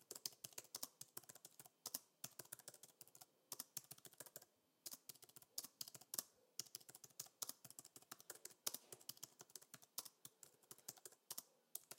Botones de teclado de computador